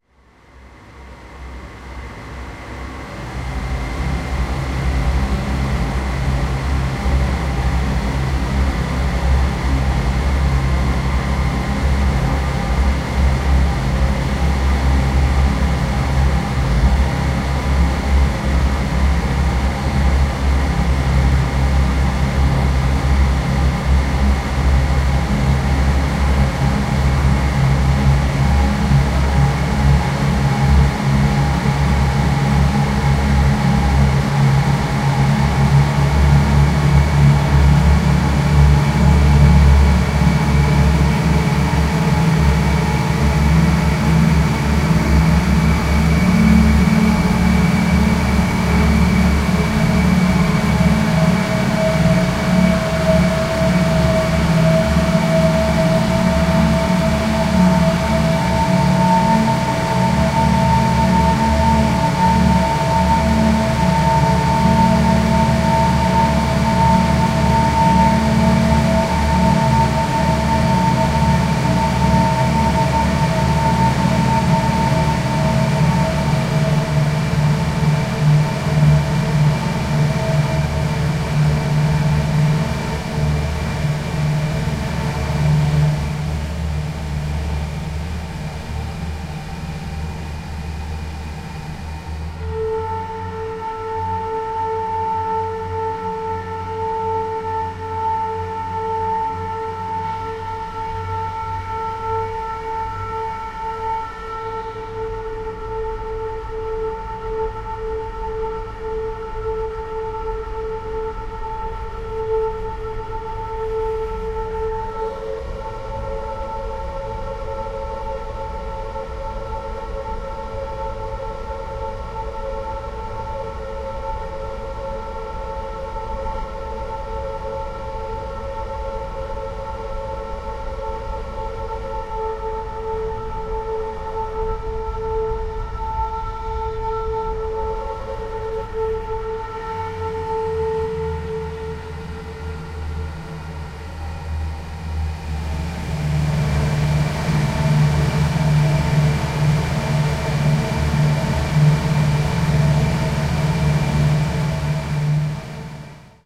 Recorded with a ZOONM pocket recorder , Traffic in tunnel ( Aachen -Eilendorf ), where i played some notes on a flute , editted with audacity. ( pitched down )